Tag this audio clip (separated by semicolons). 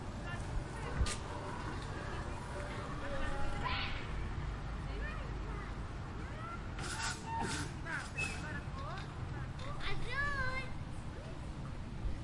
ambiance; atmosphere; kids; play; playground; playing